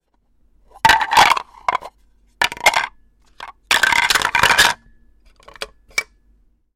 Wooden drink coasters being dropped together.